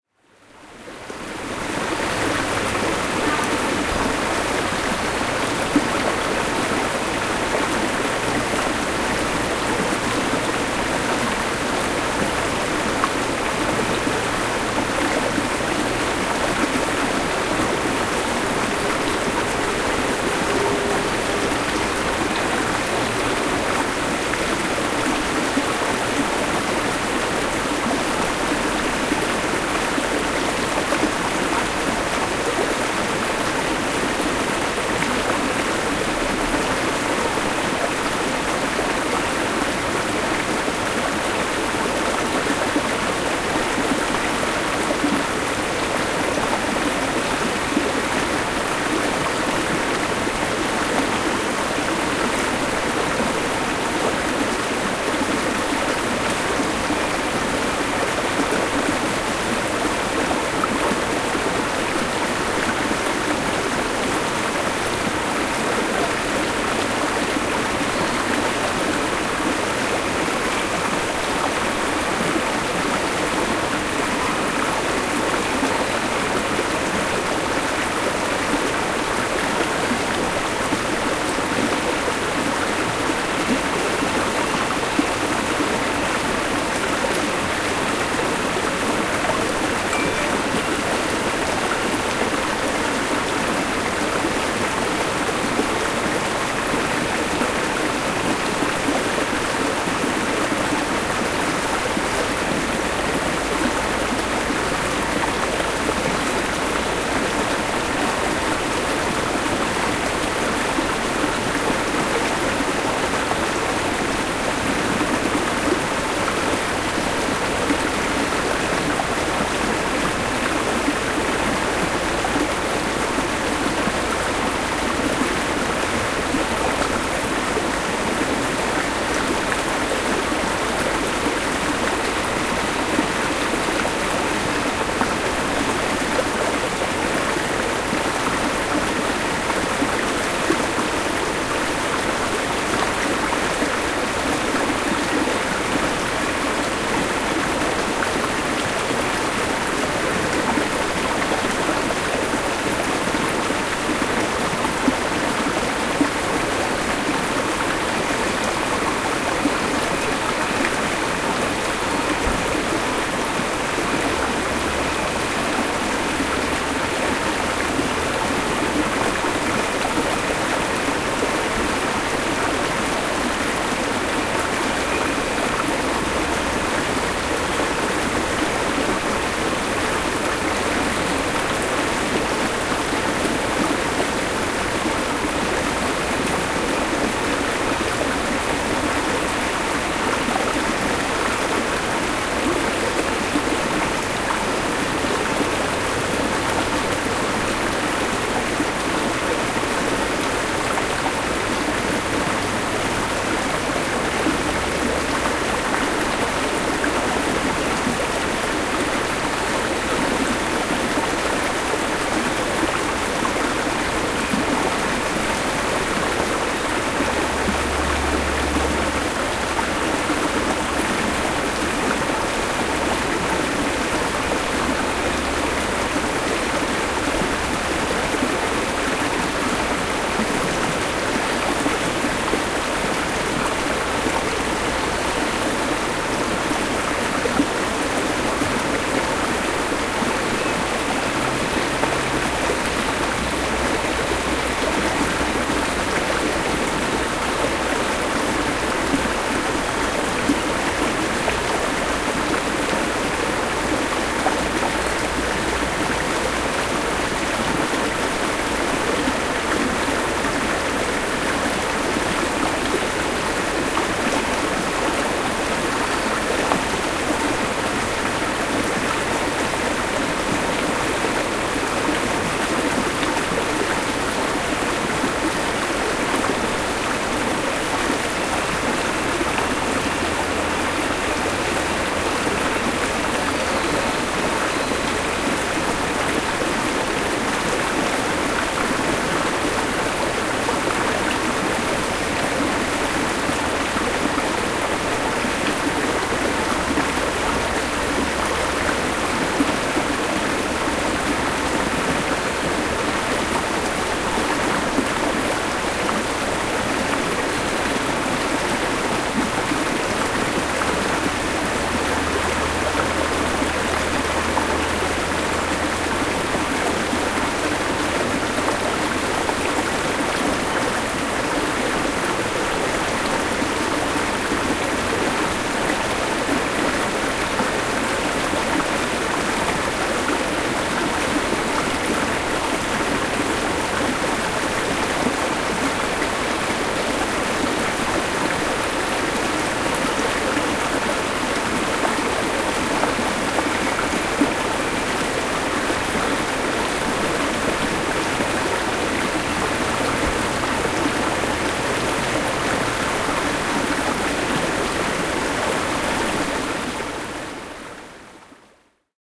Morning on the Puntledge River during the November salmon run.
atmosphere, field, forest, nature, recording